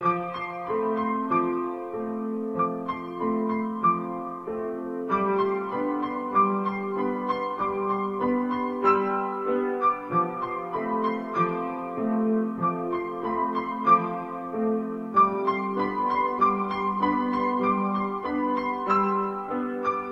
Piano Easy 1
Easy loop piano. Cuted for Loop.
Made by synth.
easy, loop, piano, soft